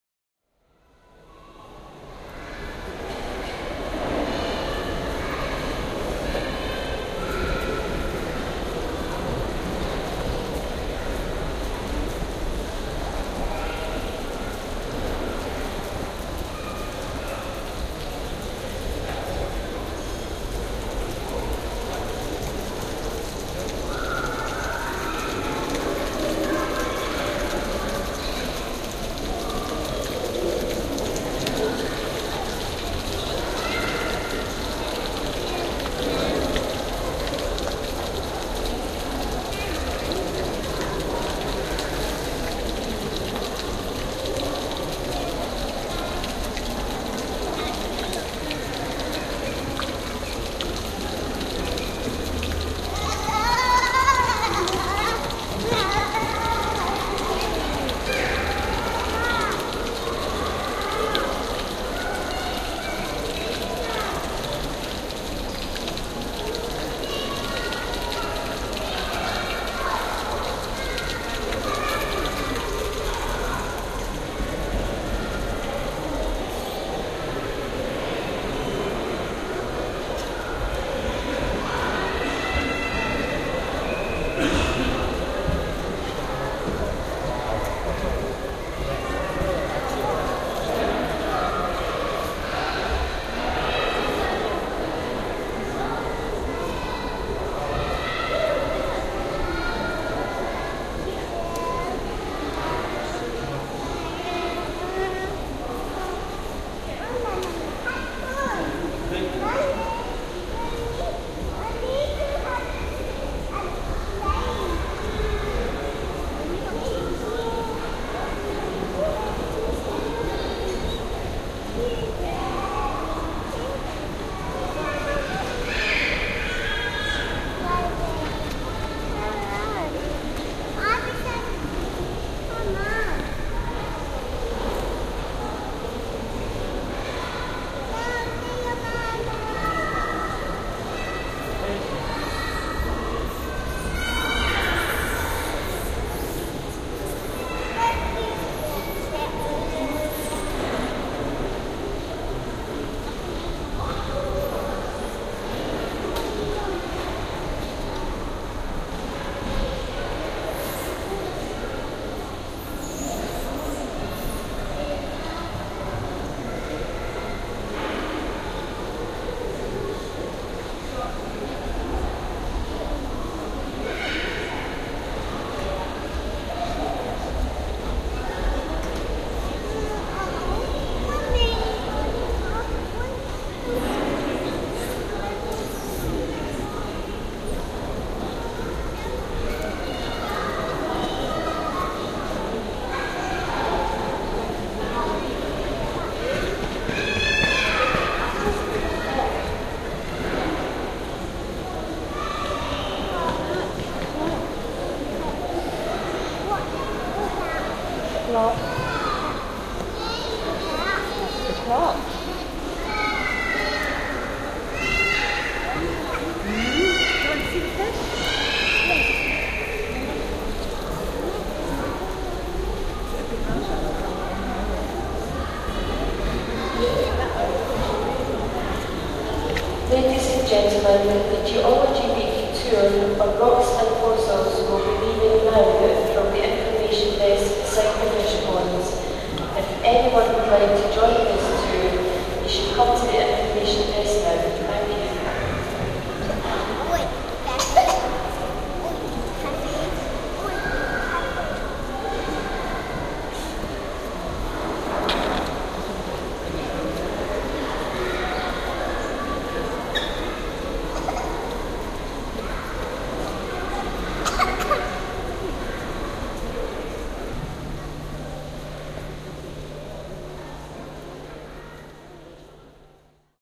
museum atmos
General atmosphere recorded in the National Museum of Scotland, Edinburgh.
Recorded on a Sharp Mini disc recorder and an Audio Technica ART25 Stereo Mic
ambience, atmos, hall, museum, people